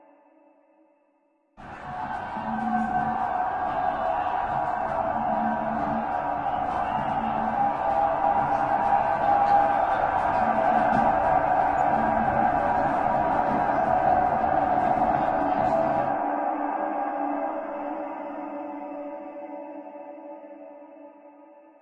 LAYERS 002 - Granular Hastings - D2
LAYERS 002 - Granular Hastings is an extensive multisample package containing 73 samples covering C0 till C6. The key name is included in the sample name. The sound of Granular Hastings is all in the name: an alien outer space soundscape mixed with granular hastings. It was created using Kontakt 3 within Cubase and a lot of convolution.
artificial, drone, multisample, pad, soundscape, space